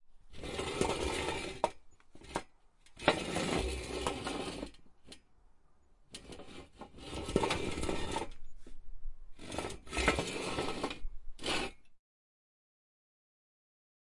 Metal chair, bricks, outside, close